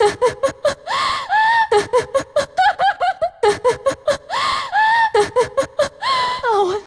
breakup vocal remix 140 bpm
I took a selection then chopped, sequenced, and leveled it to a 4-bar loop at 140 bpm. since it's all eighth notes, it could work at 70 bpm for dubstep.
Sounds freakin' nuts if you double the pitch :)
"Break up: You're sorry?" by AmeAngelofSin
female, 140, vocals, loop, bpm